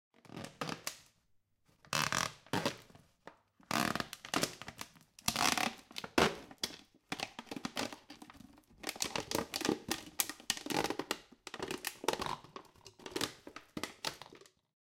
Here I'm cutting up a soda bottle with a serrated knife
bottle, knife, soda